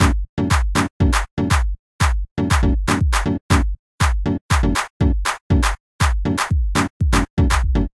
Clave Gahu otation
Ritmo polifónico
4 compases: ritmo principal de 4 pulsos + 3 modificaciones.
Sonido grave marca el pulso
Sonido agudo reproduce la clave Gahu rotation.
Sonido medio adicional.
Bass, Beat, Rhythm, Ritmo, Sincopia, Syncope, Techno